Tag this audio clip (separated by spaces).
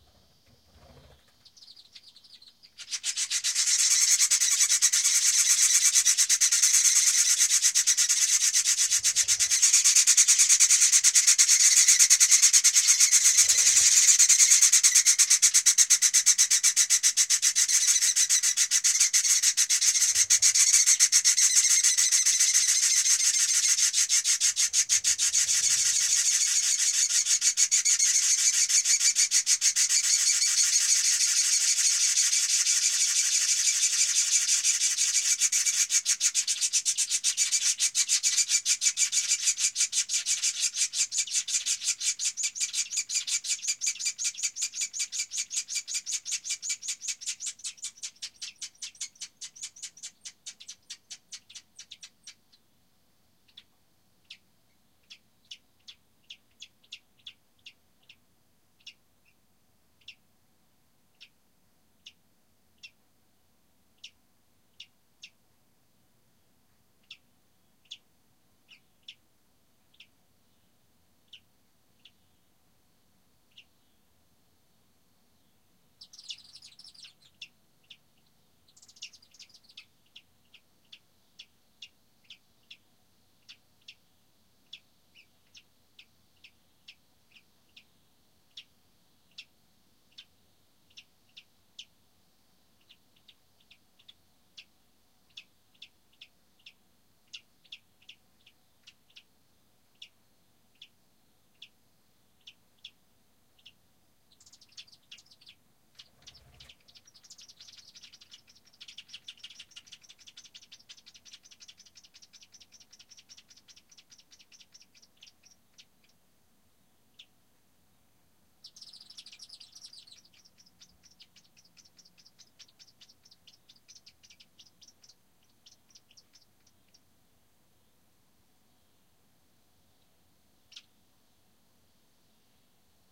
field-recording bird birdsong chimney swifts birds nature